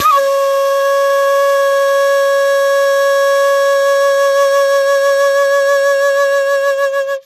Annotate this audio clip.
Flute Dizi C 073 C#6
Flute Dizi C all notes + pitched semitones
Flute, C, Dizi